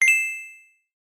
The typical sound when you play a game and you are earning some coins. 8-bit-flavoured. Purely synth-crafted.
It is taken from my sample pack "107 Free Retro Game Sounds".
game, coins, sound, retro, 8bit